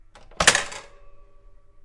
sound of a bread toaster. RodeNT4>Felmicbooster>iRiver-H120(Rockbox)/sonido de una tostadora de pan